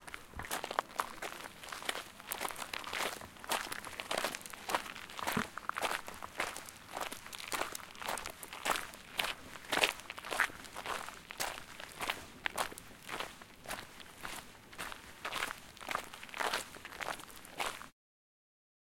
001 - Footsteps On Gravel B
Field-Recording, Footsteps, Rocks, Gravel, Walking, Foley